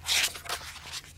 A book page turning recorded in a sound room.
book, page, room, sound, turn, turning